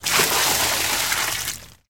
Water Splash 2
Splashing water from a plastic container onto soil and plants.
Recorded with a Zoom H2. Edited with Audacity.
water, liquid, squirt